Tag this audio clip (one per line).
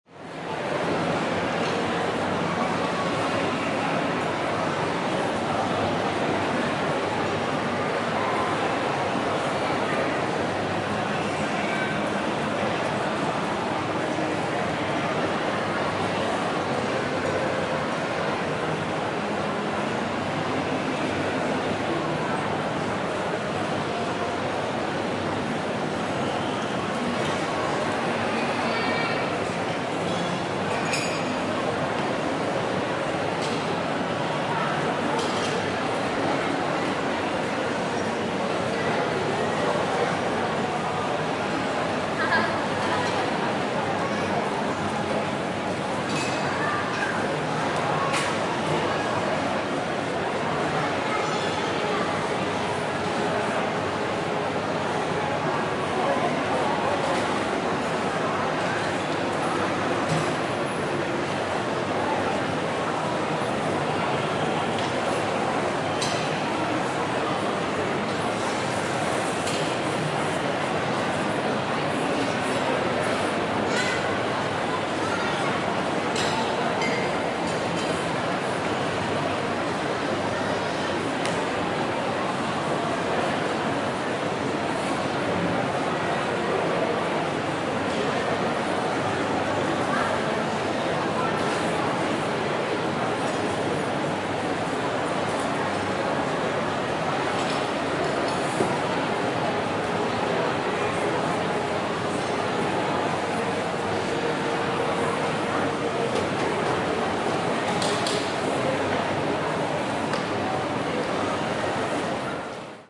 Christmas
mall
crowd